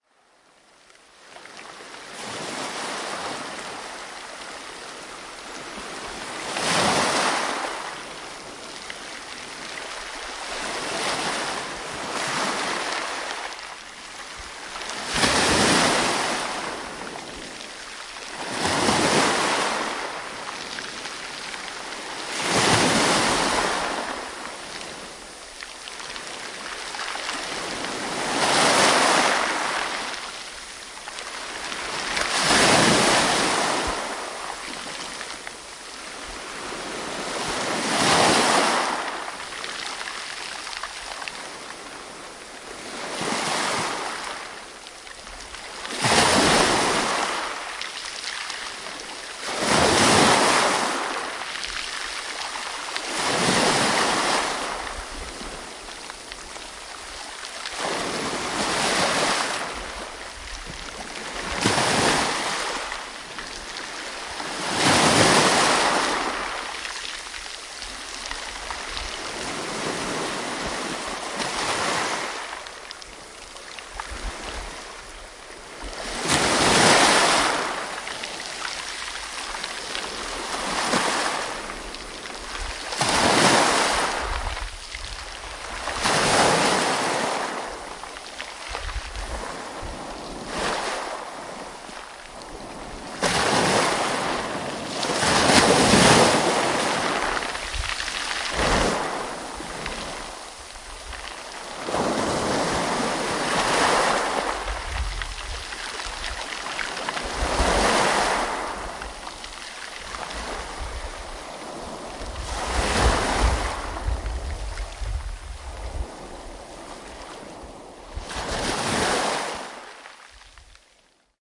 Sea Waves Rocky Beach
Close XY stereo recording with no background noises of sea waves crushing on rocks and sand in a beach located in Preveza, Greece.
beach, rocky, sand, sea, sea-waves, soundscape, waves, waves-crushing